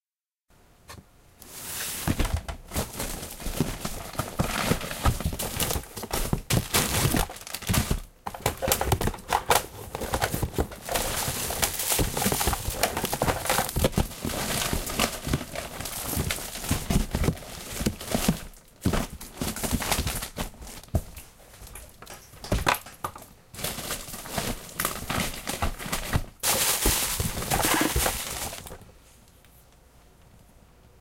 Find something in box
Recording By Zoom H5. MS miking
found something in a paper box